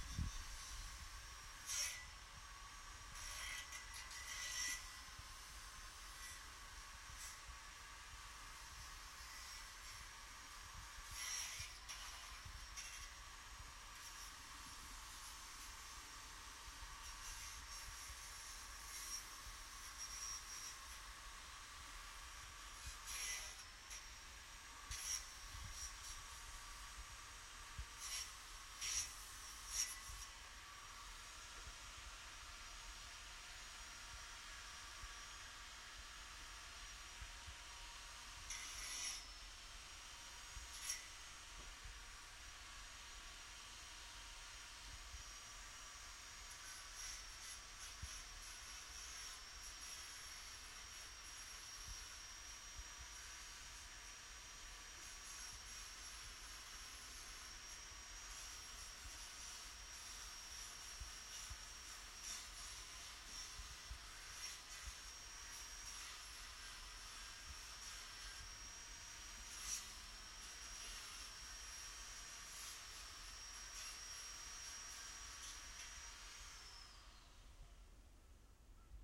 Distant Angle Grinder
A neighbour using an angle grinder for home renovations
garden, angle, hobby, site, construction, constructing, distant, grinder, building, drilling, tools, work, power, renovation, builder, tool, home